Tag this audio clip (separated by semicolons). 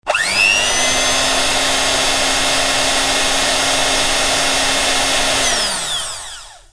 electric; appliance; beater